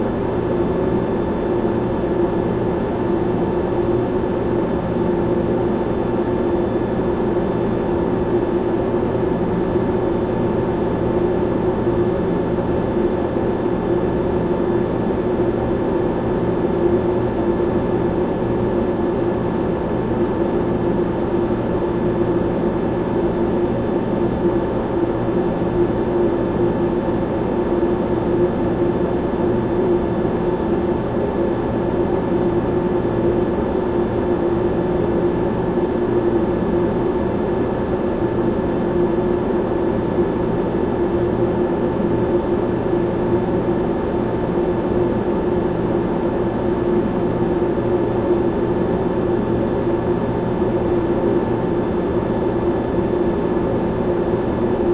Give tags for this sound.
ambience
cooler
effect
engine
fridge
loop
looping
machine
noise
sound

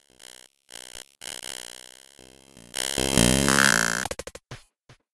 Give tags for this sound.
random
digital
glitch

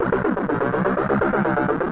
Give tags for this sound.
industrial
experimental
electronic
noise

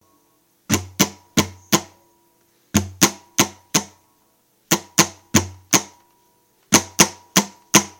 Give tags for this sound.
acapella
acoustic-guitar
bass
beat
drum-beat
drums
Folk
free
guitar
harmony
indie
Indie-folk
loop
looping
loops
melody
original-music
percussion
piano
rock
samples
sounds
synth
vocal-loops
voice
whistle

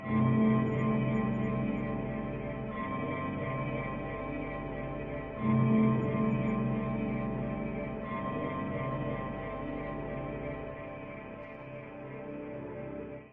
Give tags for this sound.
ambient
drone
envirement
pad
synth
textures

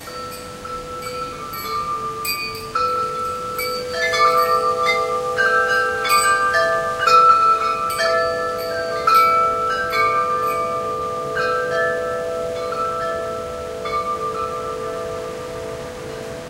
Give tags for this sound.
bells
chimes
wind

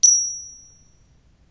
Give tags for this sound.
ethnic
small
cymbals
percussion

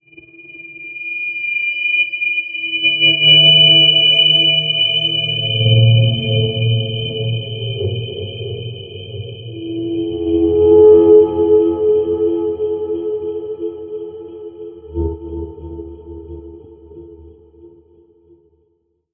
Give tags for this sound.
sweetener
effect
spacey
trippy
sfx
sci-fi
experimental
dilation
sound
time
high-pitched

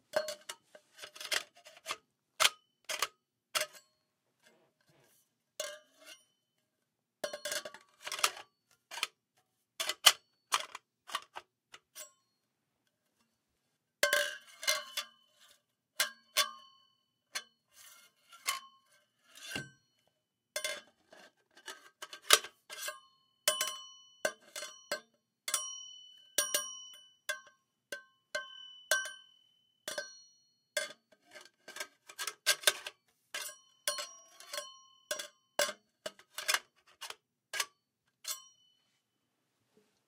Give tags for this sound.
hits
Metal
pipe
pole
ring
ringing

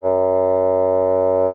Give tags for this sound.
fagott
classical
wind

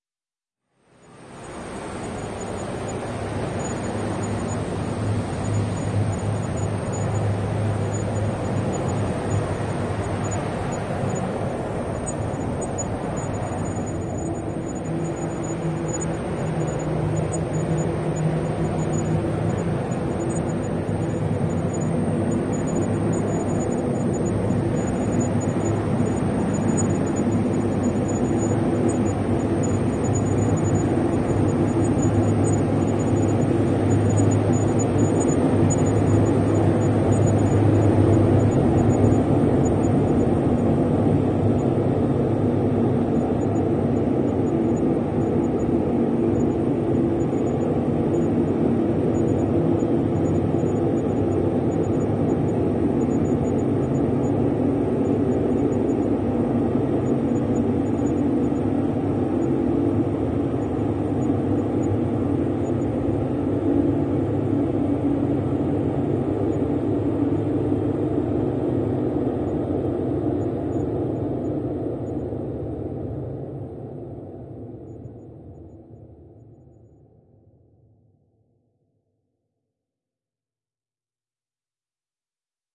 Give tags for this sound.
artificial
drone
helicopter
multisample
pad
soundscape
space

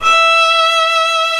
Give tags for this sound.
arco
violin

